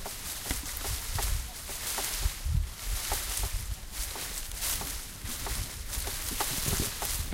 sonicsnaps LBFR Bakasso,bryan
Here are the recordings after a hunting sounds made in all the school. Trying to find the source of the sound, the place where it was recorded...
Binquenais, Rennes, La, sonicsnaps